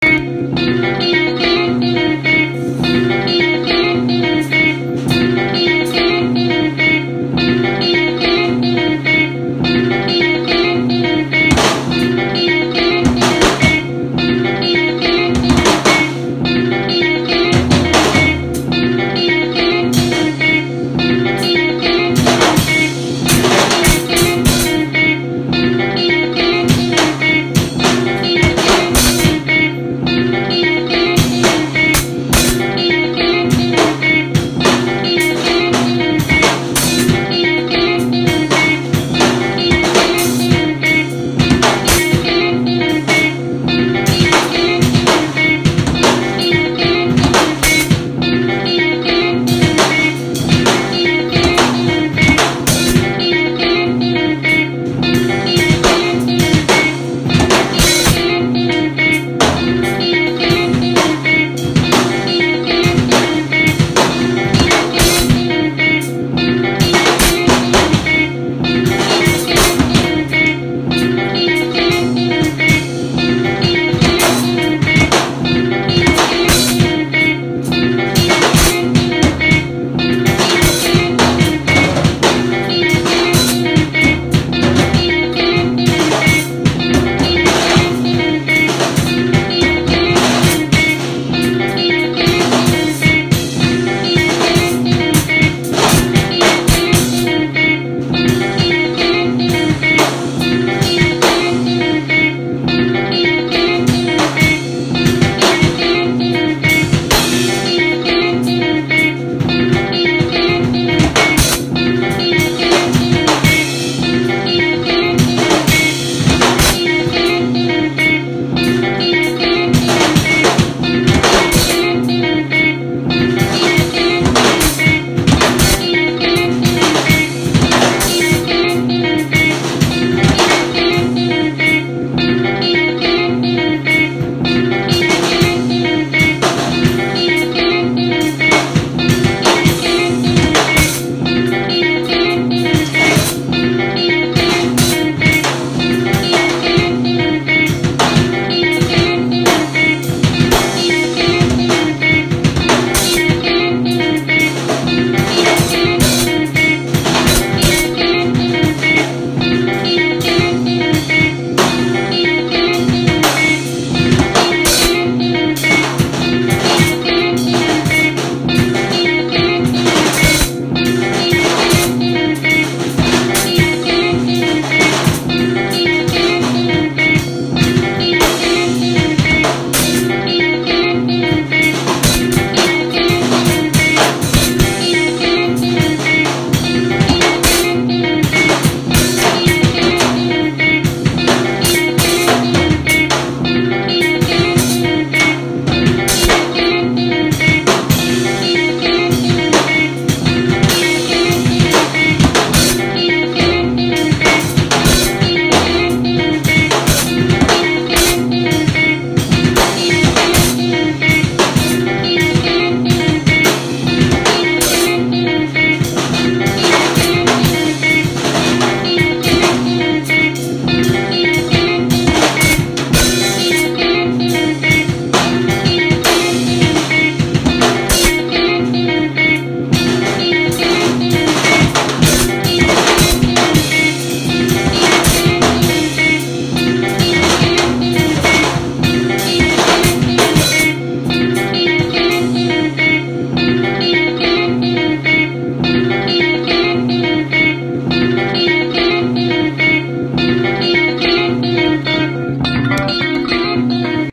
random voice memos